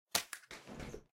Picking up an umbrella for a game potentially